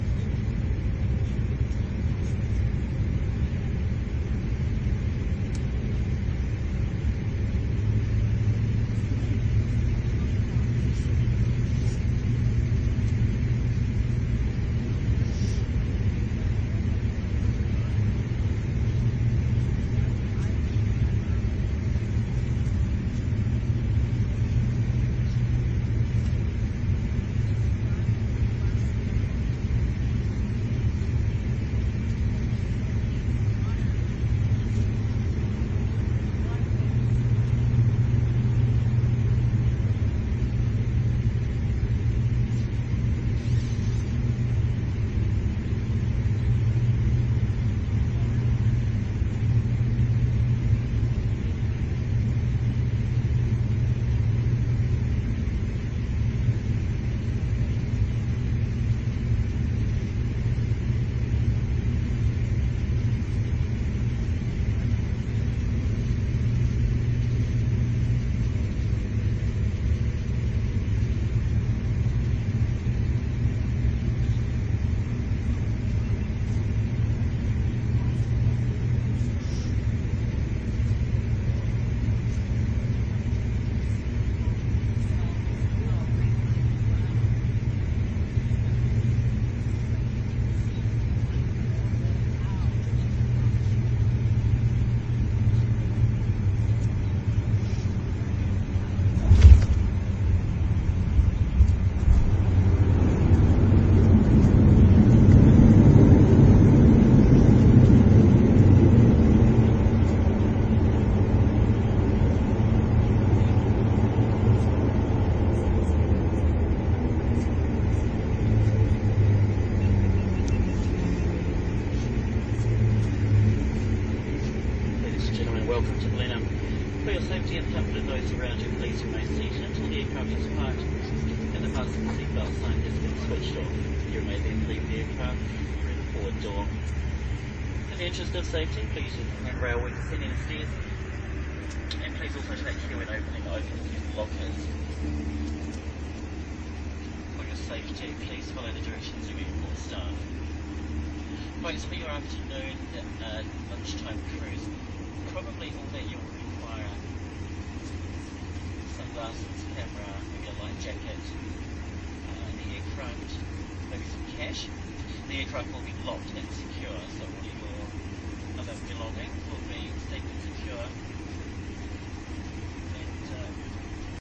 Fokker 50 Turboprop Aircraft Descent Landing and Pilot PA Announcement
Field-recording inside a Fokker 50 Turboprop passenger aircraft during descent and touchdown on landing and then taxiing. Pilot landing announcement heard during taxiing.
Aircraft, Announcement, Captain, Descent, Field-Recording, Fokker-50, Passenger-Plane, Pilot-Announcement, Turboprop